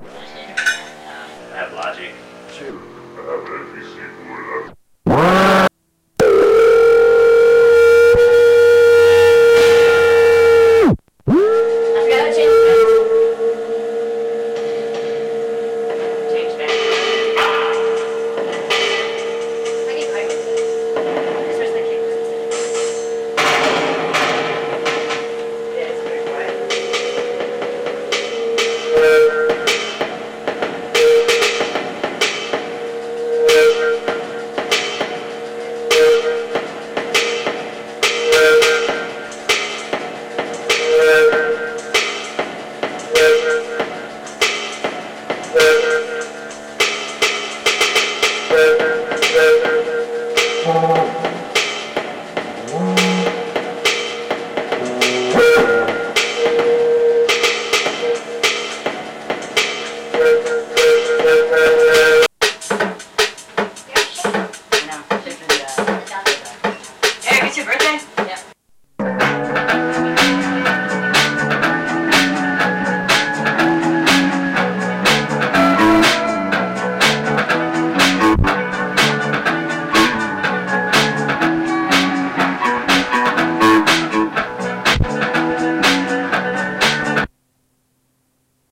3darkboys bsides
recorded this at friends haunted house. paranormal activity is present on tape. use with caution.
ambient, distortion, talking, guitar, electronic, ms20, downtempo, ghost, creepy, garbage, steve, sad, beat, paranormal, rubbish, recorder, funny, electro, brule, birthday